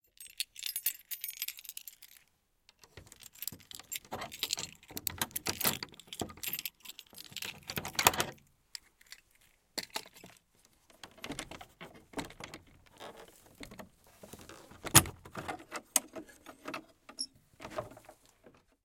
Locked Door
Recoreded with Zoom H6 XY Mic. Edited in Pro Tools.
Opening a doorlock with keys.
door, opening, keys, noise, lock, wooden